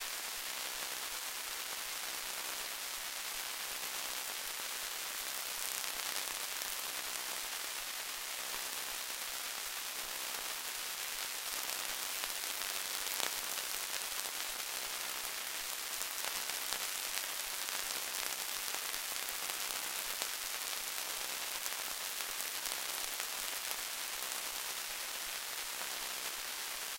Splashy Electric
Noisy , Electricity , White Noise
This sounds like white noise with a pulsating background and then some splashy sounding white noise with some resonance or something . Sounds also like static electricity
recording from zoom , signal is modular synthesiser , (lots of noise and a vco)
This sound is part of the Intercosmic Textures pack
Sounds and profile created and managed by Anon
circuit, electric, electricity, electronic, glitch, noise, sfx, static, synthesiser